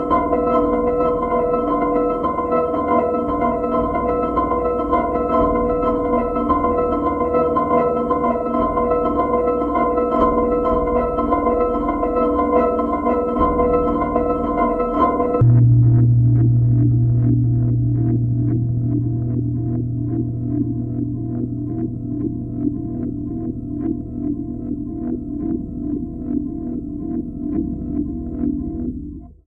Making weird sounds on a modular synthesizer.
analog, electronic, modular, noise, synth, synthesizer, synth-library, weird